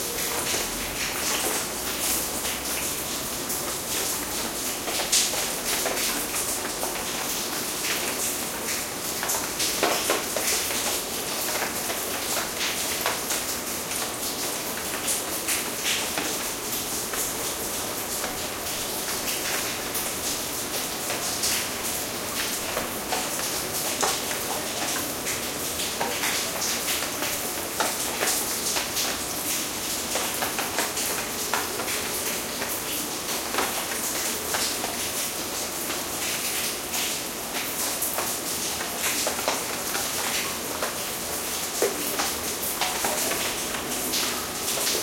Large drops of rain falling into puddles on the ground. Recorded with a Tascam DR100 recorder. Processed in Audacity (high-pass and noise reduction).
drops, puddles, rain